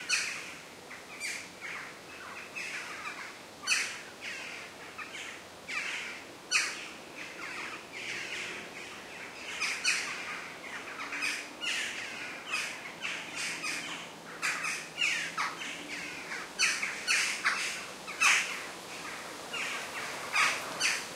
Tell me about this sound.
Birds Outdoors
birds nature field-recording bird birdsong spring forest morning ambient ambience ambiance general-noise atmosphere countryside soundscape background-sound white-noise atmospheric background
Album: Nature Sounds Of Scotland
atmosphere, general-noise